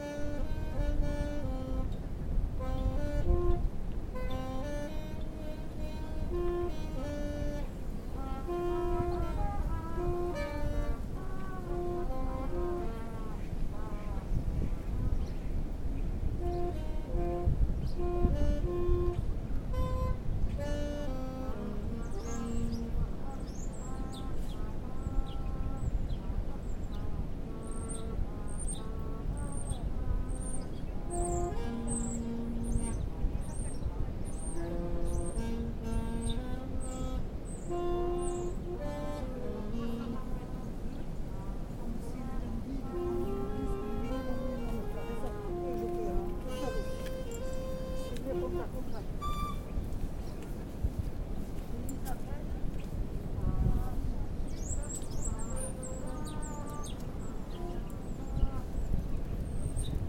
poorly recorded (phone) musicians playing / practicing sax&trumpet during the lockdown / freejazz mood in Toulouse (FR)